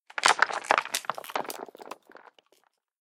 S O 1 Rocks Falling 04
Sound of small rocks hitting the ground.
This is a mono one-shot.
Stones, Rocks, Foley, Drop, Falling, Impact